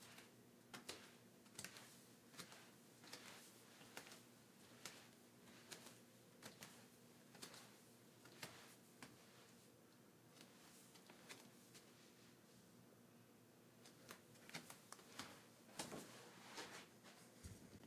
Barefoot steps on tile
Barefoot steps, with space put between the foot going down and coming up. This won't work for a natural gate, but should cut together easily to match steps. The louder sound is on the foot coming off the tile.
steps, tile